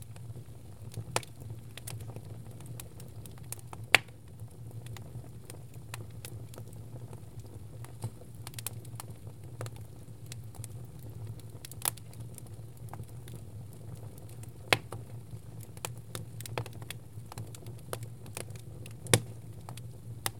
Fire Burning 02
A fire in a wood stove burning. The door to the stove is open.
fire, smoke, hot, crackling, fireplace, logs, burn, stove, crackle, sparks, spark, flame, flames, burning, combustion